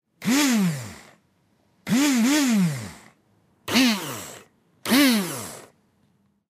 Electric Whisk Rev
Sounds of an electric whisk being revved.